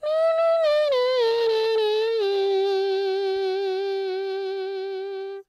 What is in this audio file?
electric guitar e minor4
Imitation of electric guitar solo part in e-minor. I almost close the mouth, sing some tones and blow little air for distortion effect.
beatbox, distorted, solo, voice